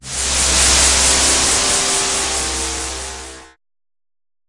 FM Crash Cymbal 2
Using AudioSauna's FM synth, an emulation of the Yamaha DX21, I have created a complete/near-complete percussion kit which naturally sounds completely unrealistic. This is one of those, a crash cymbal, the second I created.
percussion, cymbal, crash, synth, FM